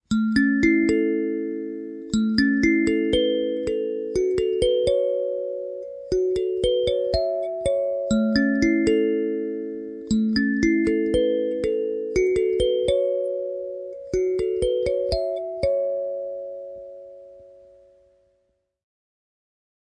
simple kalimba loop on 120 bpm
a simple hokema kalimba B9 loop, recorded on 120 bpm.